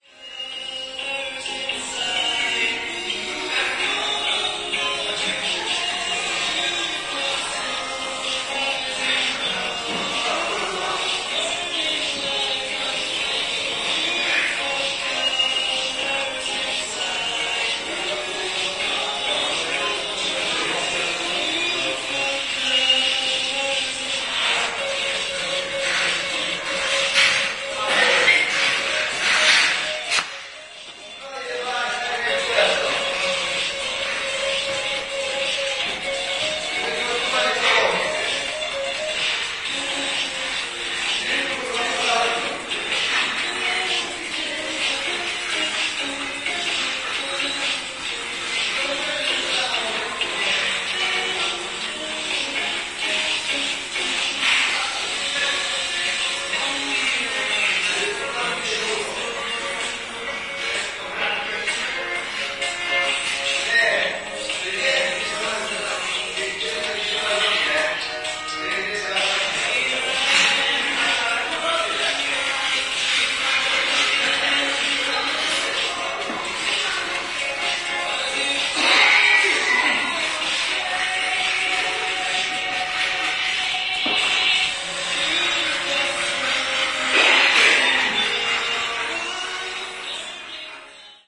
23.10.09: the old tenement in Poznań/Poland. the Plac bernardyński street: the stairwell of the tenement where I live. Four workers are renovating the stairwell. they are listening to the radio that is the most audible, in the background their voices.
filed-recording, ladder, music, noise, poland, poznan, radio, renovation, stairs, stairwell, tenement, voices, workers